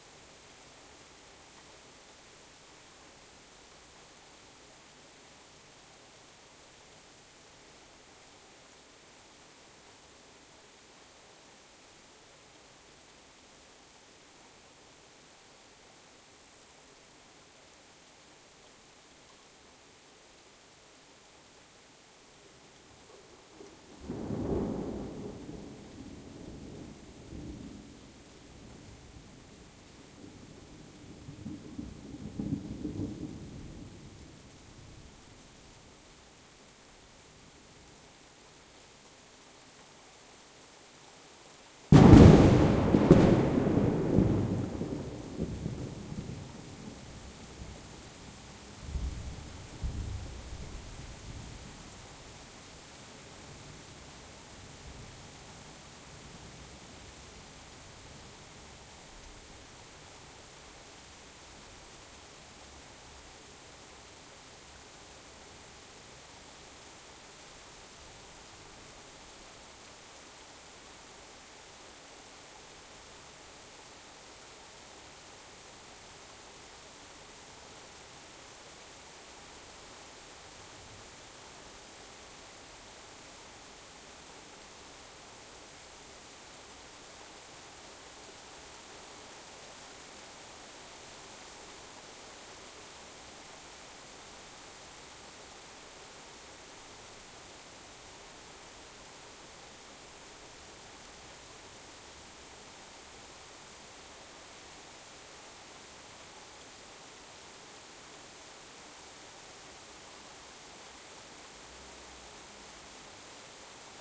Rain with one close, loud thunderclap in a quiet city. Recorded with an Olympus DM-550 on wide directivity mode (DVM).